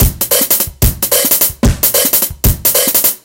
A drum loop played in my Yamaha e-drums
beat, drum, drum-loop, drums, loop, percussion, rhythm